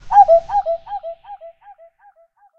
reinsamba Nightingale song coockoo-indub-rwrk
reinsamba made. the birdsong was slowdown, sliced, edited, reverbered and processed with and a soft touch of tape delay.
ambient, animal, bird, birdsong, clock, coockoo, delay, dub, echo, effect, electronic, funny, fx, happy, natural, nightingale, reggae, reverb, score, soundesign, space, spring, tape, time